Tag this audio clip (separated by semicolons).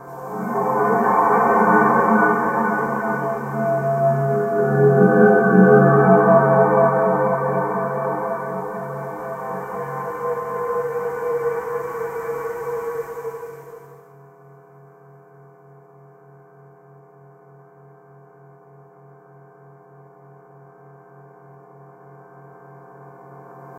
ethereal; Mammut; synthetic-atmospheres; atmospheric